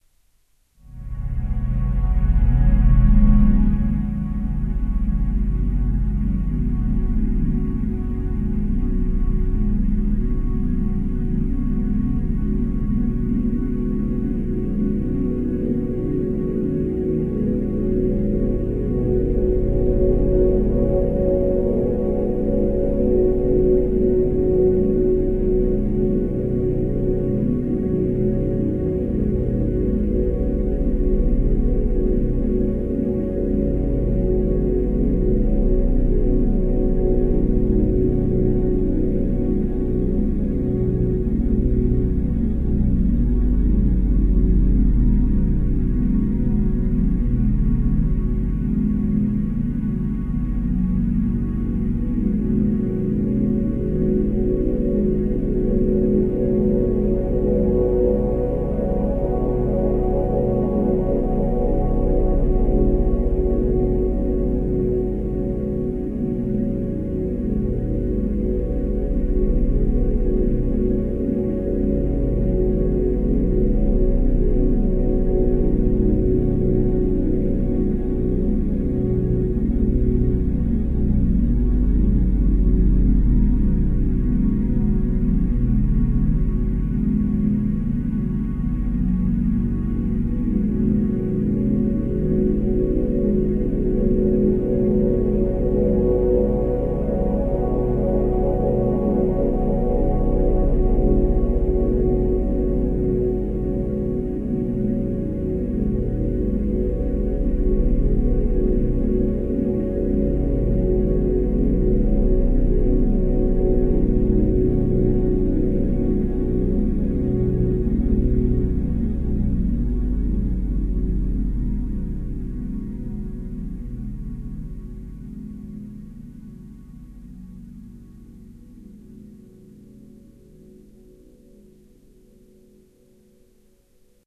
A sound to use for background sound - music or meditation. I made this with FL Studio.
ambient, atmosphere, ambiance, background, background-sound, meditate, soundscape